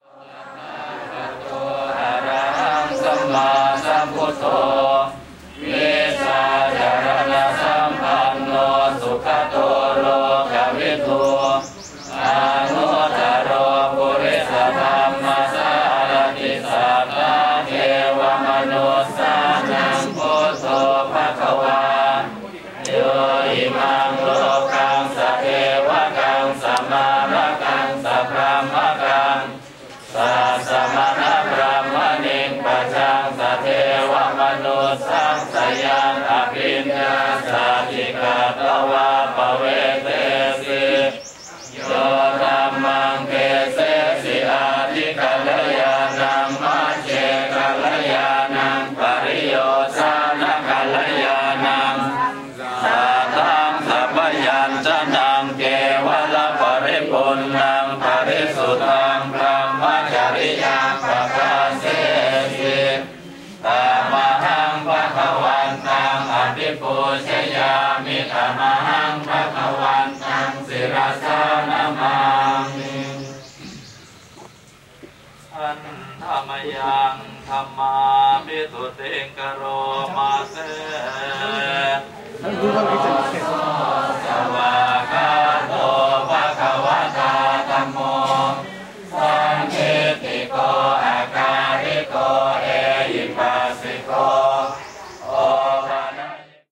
Buddhist monks chanting and prostrating themselves in front of Dungeshwari Temple in Gaya Bihar,, India.
Here, Buddhist monks are chanting and prostrating themselves in front of the Dungeshwari Temple, also known as Mahakala Cave, (where Buddha starved himself while meditating during 6 to 7 years). In the background, you can also hear some birds and sounds from the surrounding.
Fade in/out applied in Audacity.
Please note that this audio file is extracted from a video kindly recorded in February 2019 by Dominique LUCE, who is a photographer.